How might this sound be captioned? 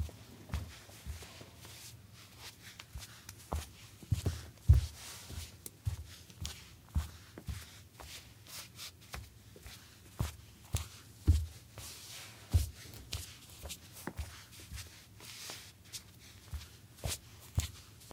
Footsteps, Solid Wood, Female Socks, Flat-Footed, Slow Pace